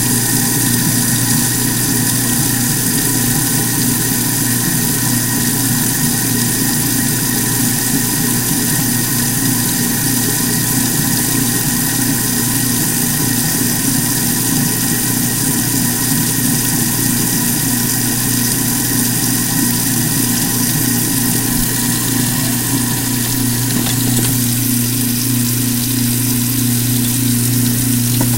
The garbage disposal in the sink...